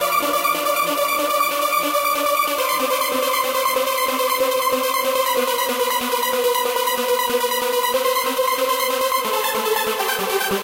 Live Trance Synth 05
arp, live, melody, sequenced, synths, trance